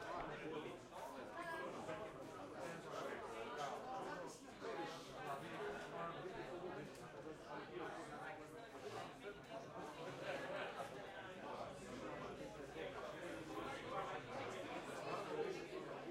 People talking and waiting concert in small caffe club